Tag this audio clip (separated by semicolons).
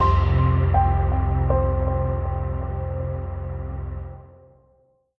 computer; jingle; music; start-up; startup